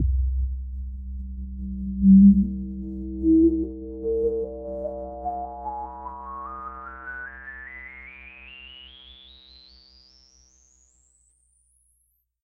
Harmonic Resonance C3
A patch created and accidentally discovered by me in a Korg Poly-800. Should be some sort of percussion sound, but, releasing the key very quickly, I came to a resonant filter sweeping through the the harmonics of the original sound.
A patch made with this sample would result in an ethereal and dreamlike one, specially if you add to the end of the sample a reversed copy of it.
I sampled five octaves of C (although those notes are not clear in fact) and made a 6th sample with a mix of the five previous.
ethereal, harmonic, harmonic-sweep, resonant, resonant-filter, synth-fx, synth-pad